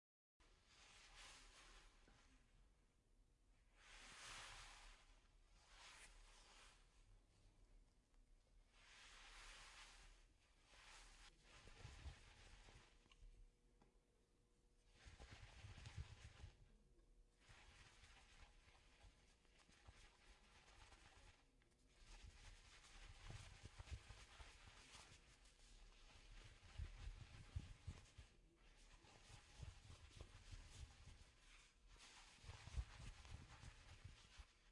Cloth Rubbing 1
Cloth for foley
cloth, film, foley